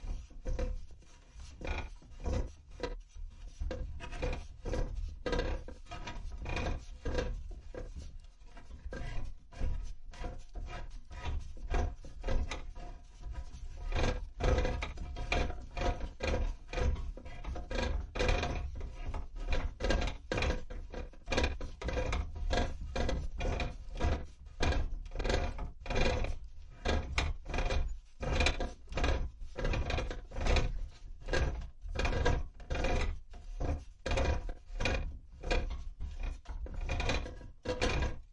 Making sound, dragging the fluorescent lamp round in my hand. Recorded with ZOOM H1.